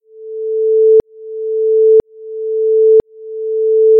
CHAMBON Candice 2014 2015 Alarm
HOW I DID IT?
generate a wistle sound (sinus) at 440hz, 0,8 dB, 1 sec
apply effects : Fade in, Repeat x3
HOW CAN I DESCRIBE IT? (French)----------------------
// Typologie (Cf. Pierre Schaeffer) :
N + X’
// Morphologie (Cf. Pierre Schaeffer) :
1- Masse: son cannelé
2- Timbre harmonique: terne
3- Grain: lisse
4- Allure: simple
5- Dynamique : attaque douce
6- Profil mélodique: pas d’hauteur différente
7- Profil de masse
Site : une seule hauteur de son
Calibre : pas de filtrage ni équalisation
alarm, alert, Stressful, warning